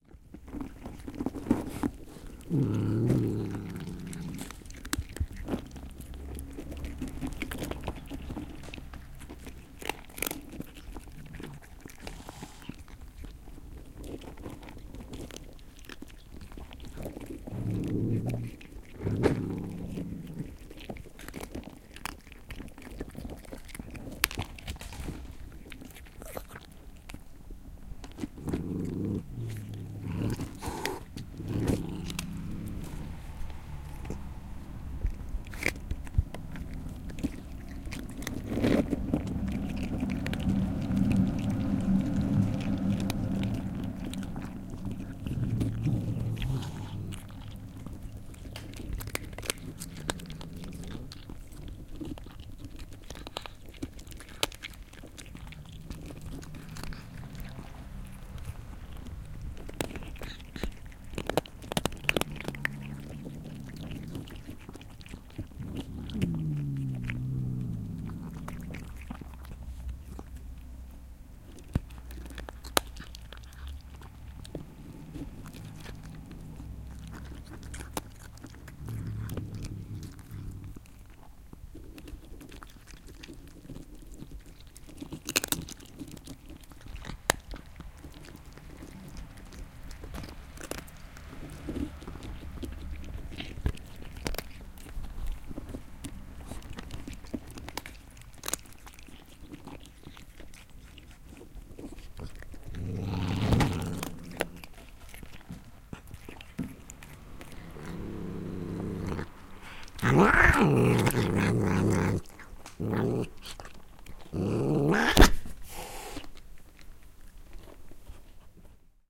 eating cats 250611
25.06.2011: about 19.00. at my home. four crunching, hissing and growling cats. they were eating cats feed from huge box.
animals, cats, cracking, crunching, eating, field-recording, growling, hissing, inside, poland, poznan, spitting